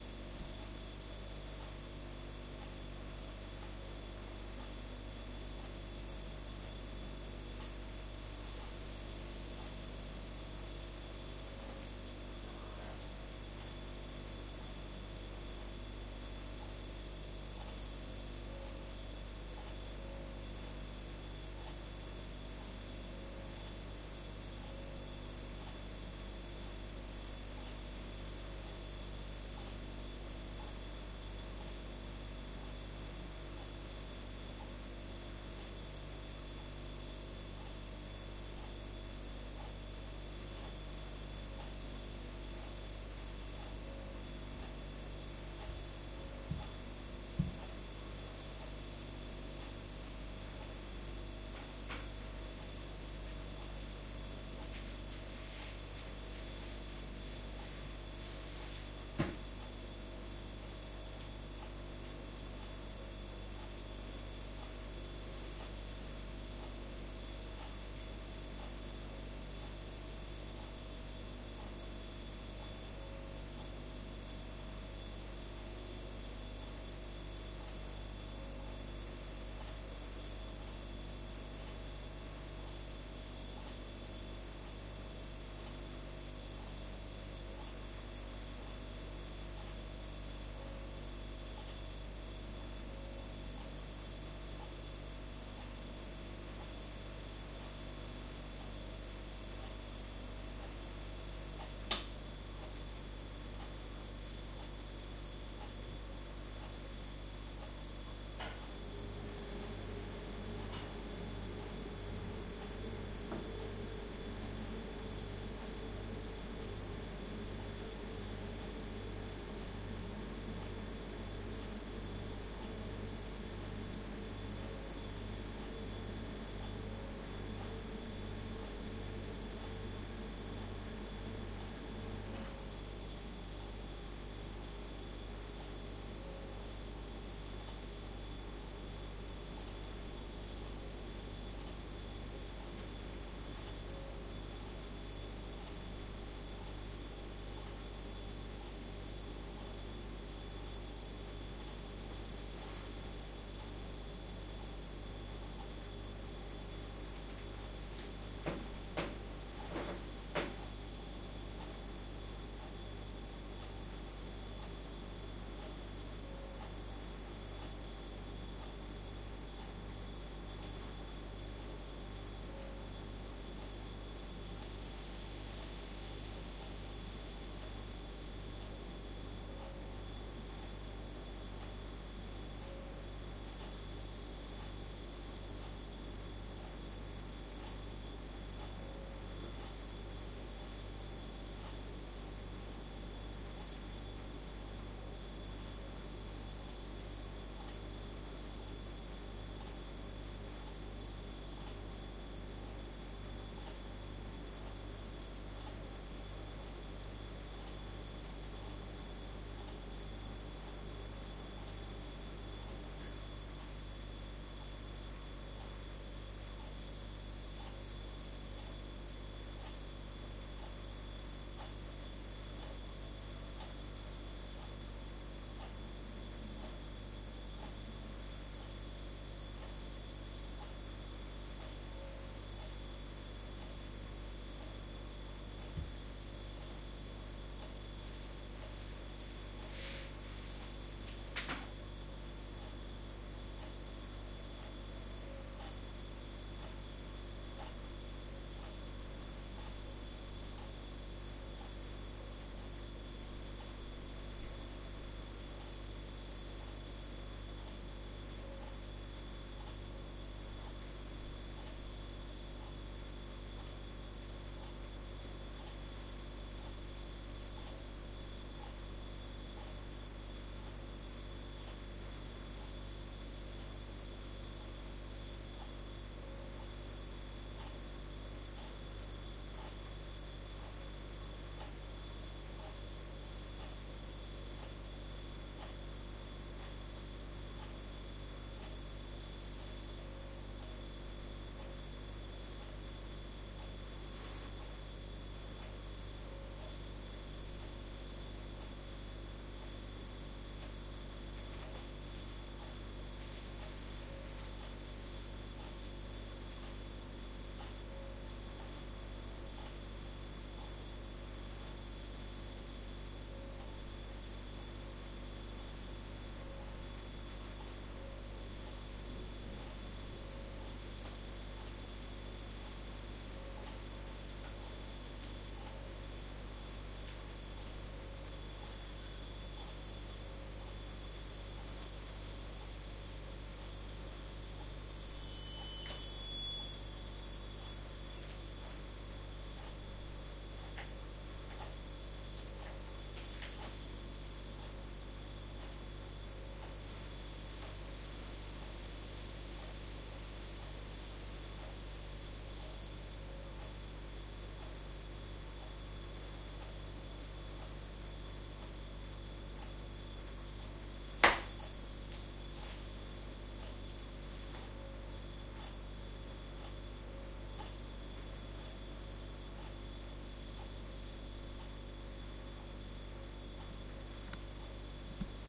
the second recording where I wanted to record the very basic environment sounds in my kitchen. sound of the fridge, clock ...